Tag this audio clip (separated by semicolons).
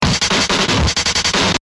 deathcore; e; fuzzy; glitchbreak; l; love; processed; t; y